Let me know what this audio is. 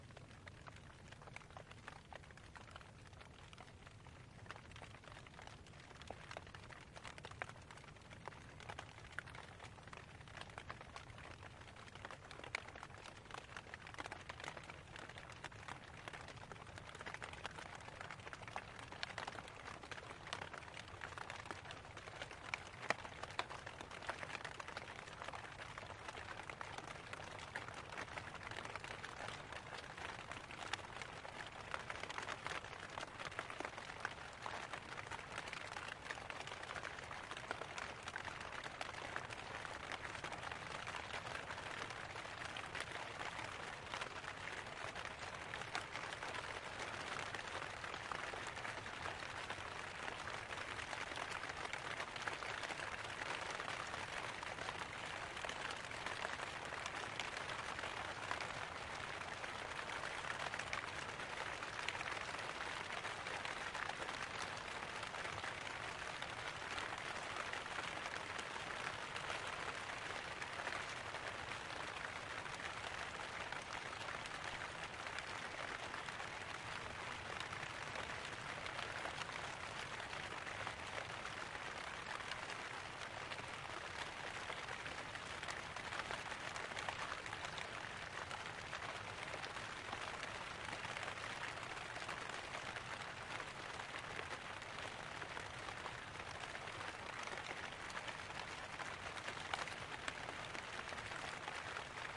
rainonleaves June2006
sony ecm-ms907,sony mindisc; rain falling on large butterburr leaves.
rain, weather, ambience, fieldrecording, leaves, nature